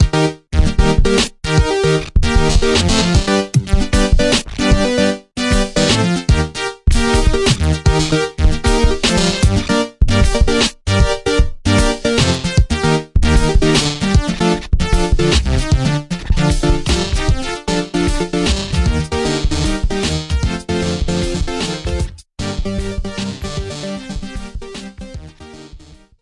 Lost Moons -=- 8bit Gumball Machine
A small bit of an action packed synthetic melody ...please enjoy!
8bit, fast, harmonix, paced, short, wacky